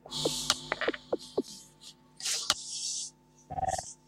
CR - Atmospheric percussion
Good day.
Recorded with webcam - some sounds then sequence, change speed, reverb.
Support project using
ambient, atmosphere, background-sound